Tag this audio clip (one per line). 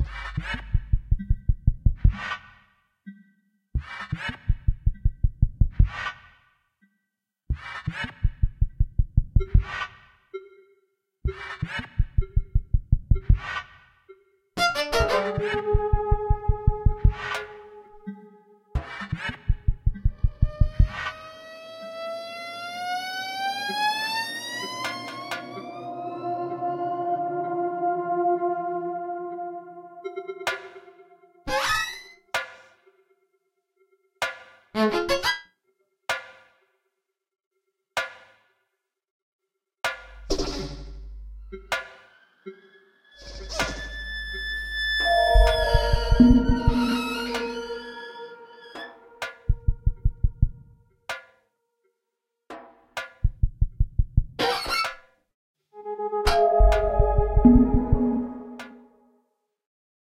Aalbers
Calidoscopi19
SantAndreu
SaraFontan
Soundscapes